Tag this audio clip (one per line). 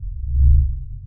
loopable
bassfull
heartbeat
synthetic